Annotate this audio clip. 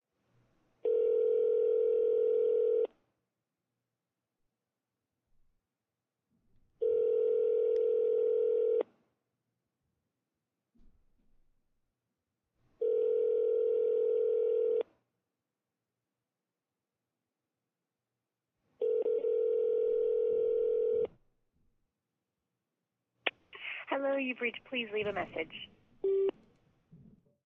Telephone, speaker, rings, voice mail beeps, cell, 10
Earpiece perspective, ringing, voice-mail (2010). Sony M10.
rings, telephone, voice-mail